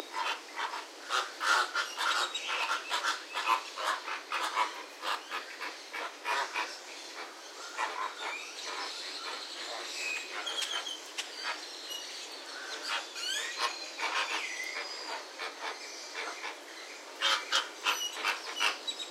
saz flamingos
A small group of flamingos calling, with grackles in the background.
aviary, exotic, flamingos, tropical, waterfowl, zoo